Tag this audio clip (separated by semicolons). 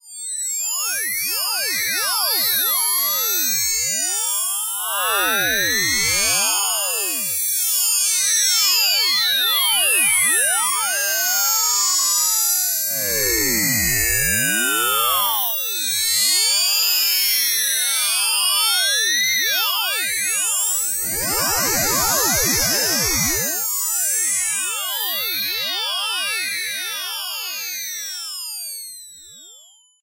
fm-synthesis; heterodyne; radio; radio-tuning